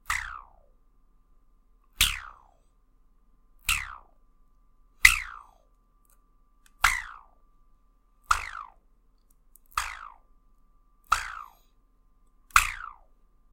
Elastic Pings 001
Some odd sounds with elastic bands.
elastic, noises, ping